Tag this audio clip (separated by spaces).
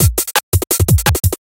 beat dnb jungle